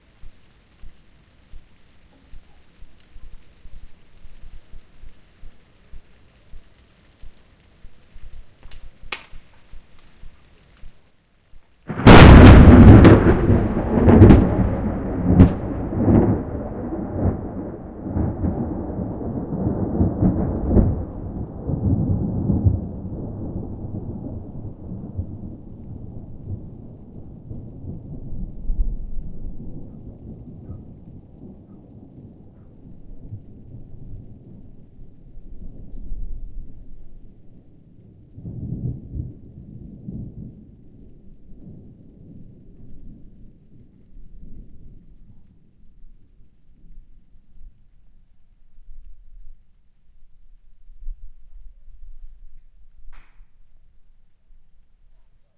Really loud powerfull thunder sound recorded with a t.bone SC140 stereoset in the mountains of Madrid. It is clipped but it sunds nice.